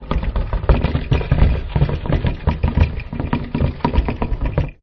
Hitting Stairs Suitcase 01
case, drag, effect, hit, hitting, metallic, stairs, suitcase